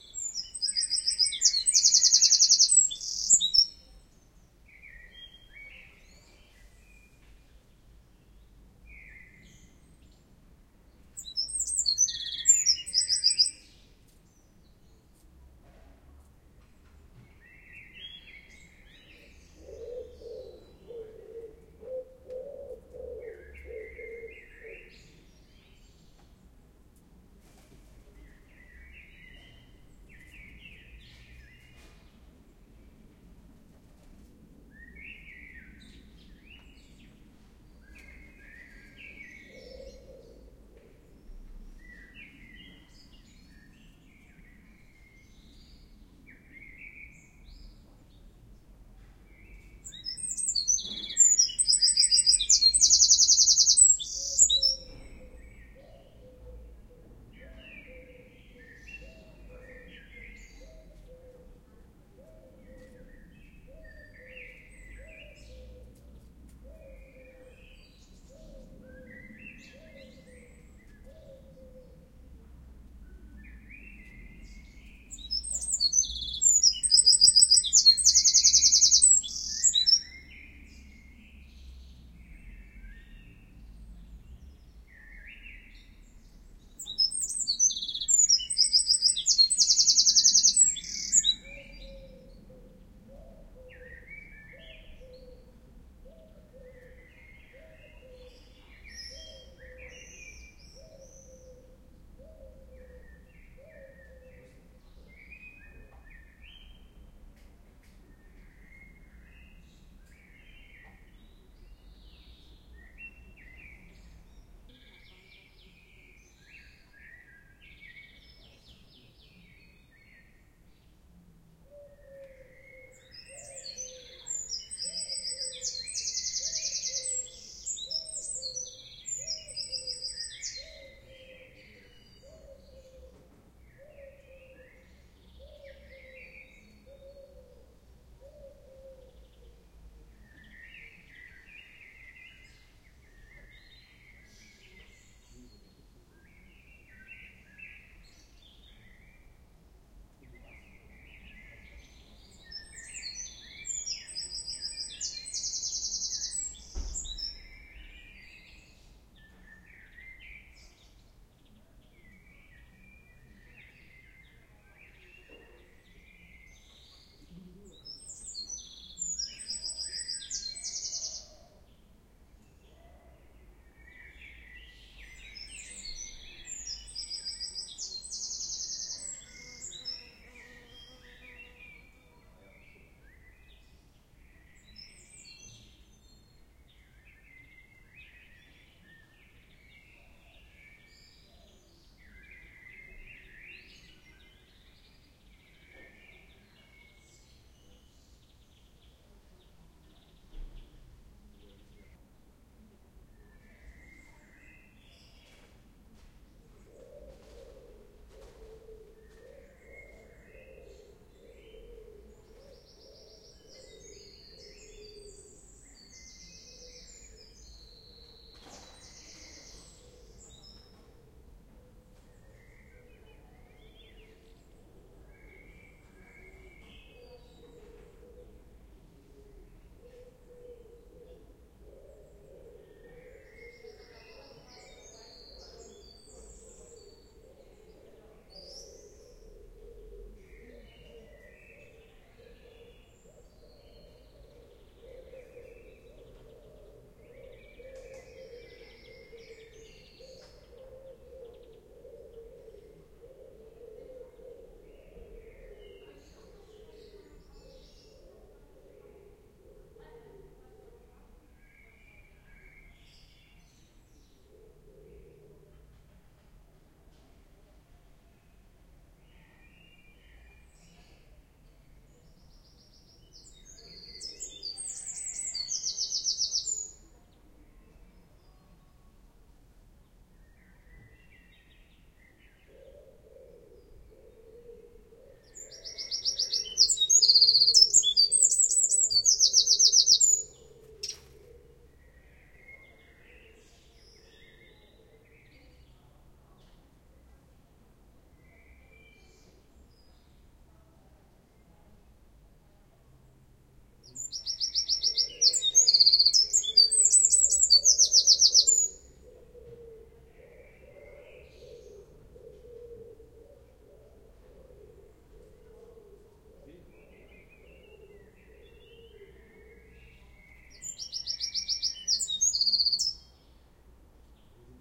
As this wren has its roostingpocket nearby, it is easy to record his song, this time with the build-in microphones of the Zoom H2 recorder.